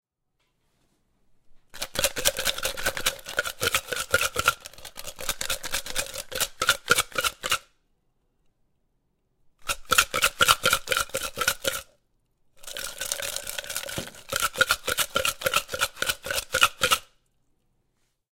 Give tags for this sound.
cubes ice metal tumbler

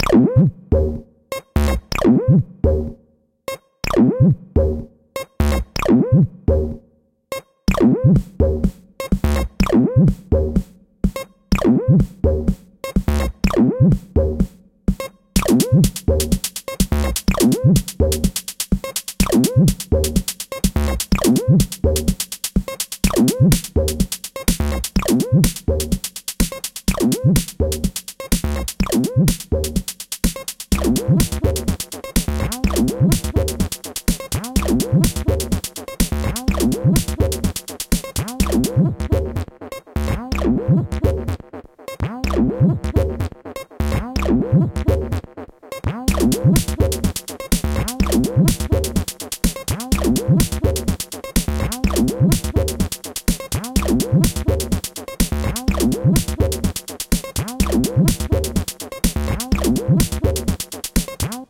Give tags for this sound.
Dance Acid Loop 303 125bpm

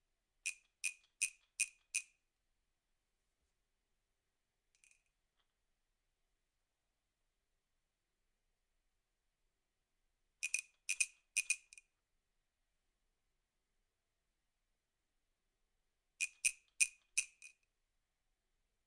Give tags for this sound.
cricket the-night percussion meinl sounds fx jerusalema

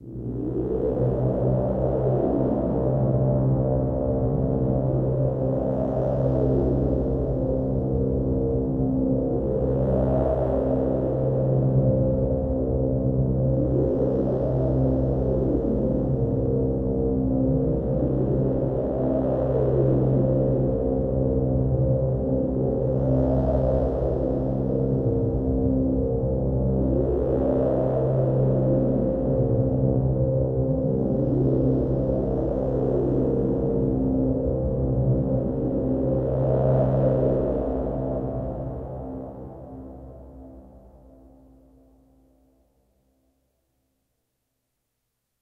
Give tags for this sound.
background spacecraft effect ambient acoustic synth ambiance strange alien-sound-effects science-fiction dark alien cinematic futuristic fx atmosphere future ambience sci-fi efx sound-effects ufo effects cartoon space eerie

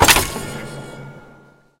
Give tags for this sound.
mechanical noise machinery ignition machine stomp industrial

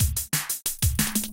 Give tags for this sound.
break dnb drum-and-bass drum-loop drums

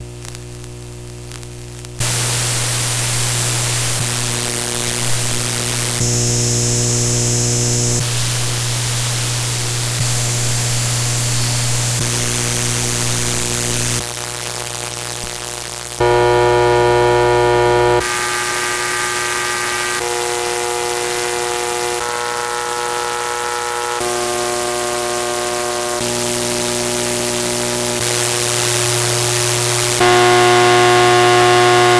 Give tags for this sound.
electromagnetic-radiation experimental

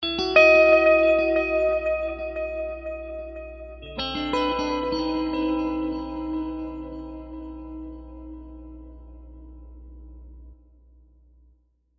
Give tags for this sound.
guitar chords ambient

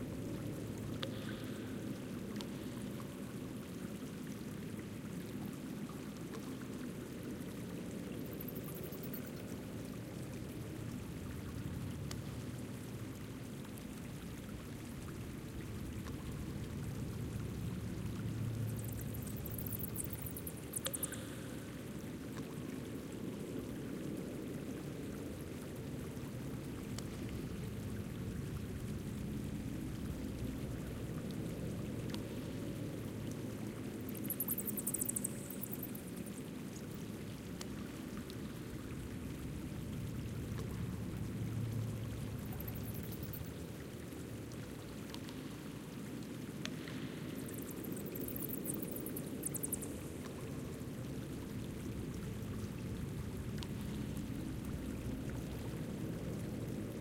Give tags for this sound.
ambiance animaton atmosphere cave drip dripping drop drops games loop splash stream video water

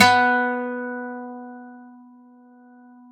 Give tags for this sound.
1-shot acoustic guitar multisample velocity

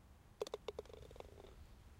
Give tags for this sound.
golf hole putting scoring

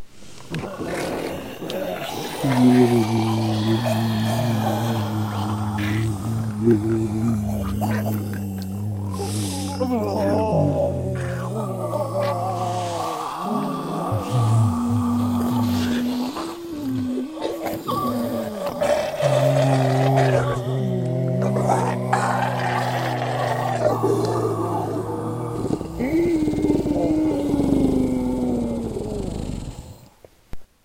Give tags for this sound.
creepy eerie evil freaky haunted horror nightmare scary spooky undead zombie

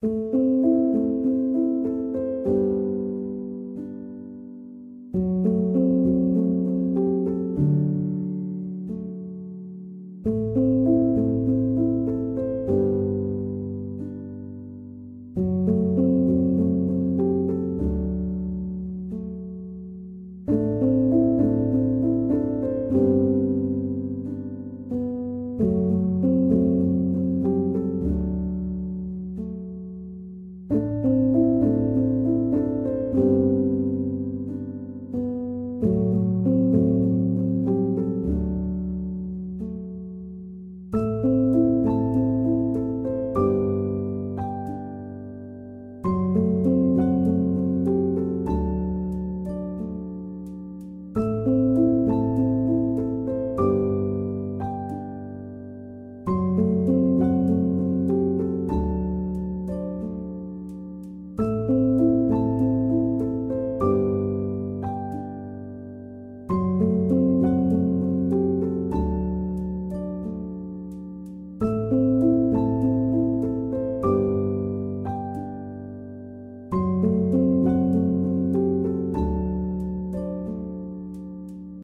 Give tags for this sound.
Atmosphere Loop Music Piano Mysterious

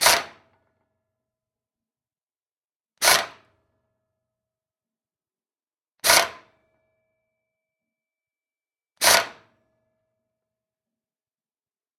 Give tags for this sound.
motor crafts pneumatic-tools metalwork impact-wrench labor 80bpm ingersoll-rand 1bar tools pneumatic air-pressure work metal-on-metal

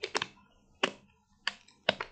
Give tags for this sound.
typeewwriter type-writer typewriter

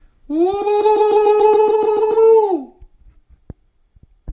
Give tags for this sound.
indian native salute

